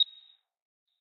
Short beep sound.
Nice for countdowns or clocks.
But it can be used in lots of cases.